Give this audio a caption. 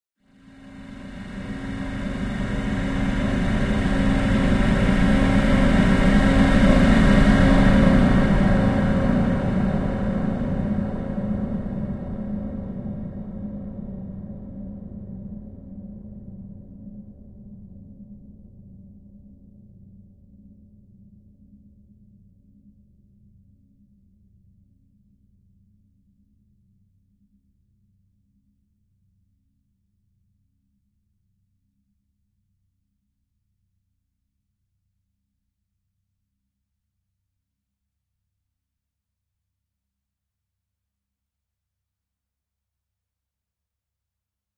This Sound defines the opposite world where you enter a dimension of a mirror.
Ringing, Sounds, Chimes, SFX, Field-recording, Recording, H4n, Foley, Chime, Music, Ding, Cow, Ring, Sound, Church, Box, Quality, Antique, Cowbell, Bells, Ambience, Wind